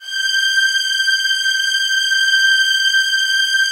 14-synSTRINGS90s-¬SW
synth string ensemble multisample in 4ths made on reason (2.5)
multisample, strings, synth, g5